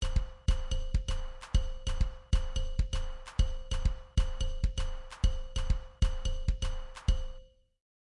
Ejercicio de ritmo sincopado, hecho con samples de Fl studio
Rythm, Syncopation